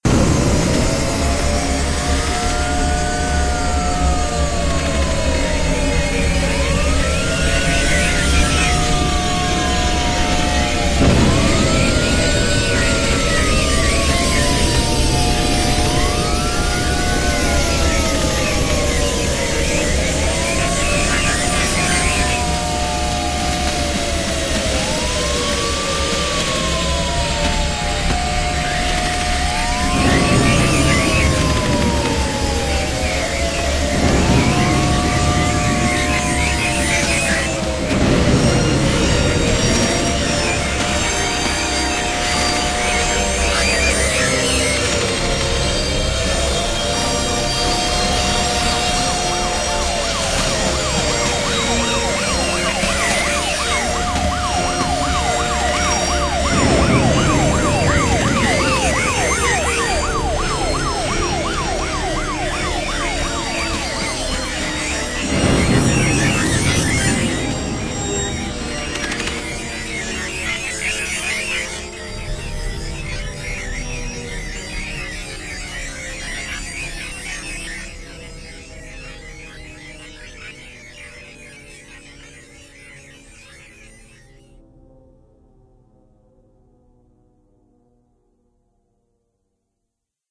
waroftheWorlds2anthem
War of the Worlds ( my version) aliens attacking America it sounds like America loses. features sounds by:
aliens, anthem, bombs, natl, sounds, space, war